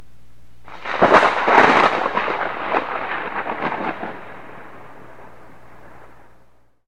Some more thunder recorded from a window.
I Recorded it with an optimus tape deck and an old microphone (The tape I recorded it on was a maxell UR), I then used audacity and the same tape deck to convert it to digital.
If you use it please tell me what you did with it, I would love to know.